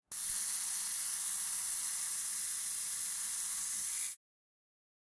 self timer on film camera
short audio file of the mechanical self timer going off on an old vintage pentax film camera
camera-click, canon, owi